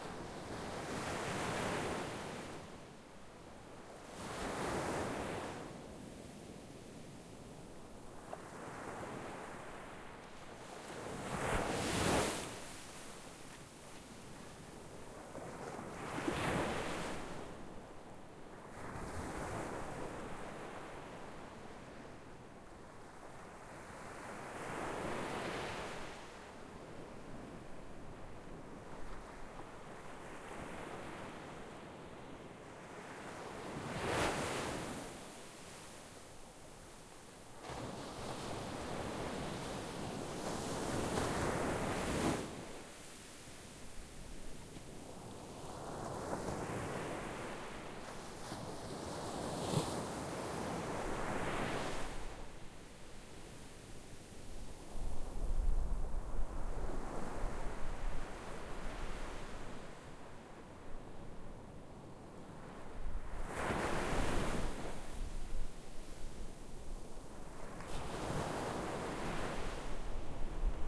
Recording of the ocean waves.
ambience, beach, crash, ocean, sea, seaside, shore, surf, water, waves